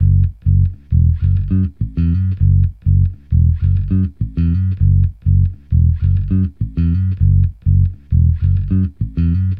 FunkBass GrooveLo0p Gm 4
Funk Bass Groove | Fender Jazz Bass
Funky-Bass-Loop, Hip-Hop, Loop-Bass, Bass-Recording, Fretless, Logic-Loop, Jazz-Bass, Drums, Funk-Bass, Bass-Sample, New-Bass, Beat, Synth-Bass, Groove, Soul, Bass-Loop, Funk, Fender-PBass, Bass-Samples, Compressor, Fender-Jazz-Bass, Ableton-Loop, Bass, Ableton-Bass, Bass-Groove, Synth-Loop